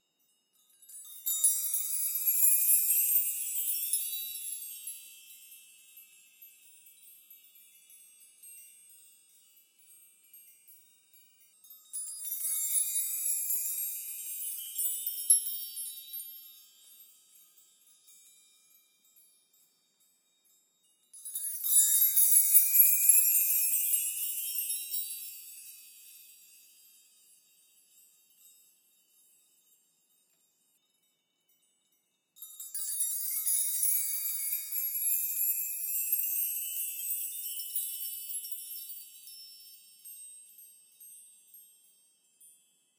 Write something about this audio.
Close-mic of a chime bar made from various size house keys, strumming from low to high pitch. This was recorded with high quality gear.
Schoeps CMC6/Mk4 > Langevin Dual Vocal Combo > Digi 003